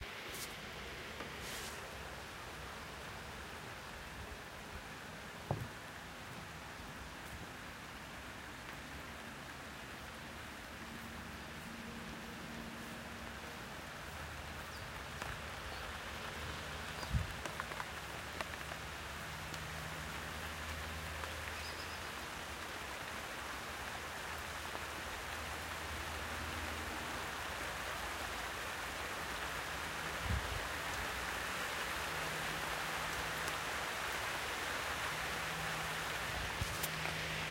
Rain sound effect I made for a video game I developed.
Water, Rain, Nature, Storm, Environment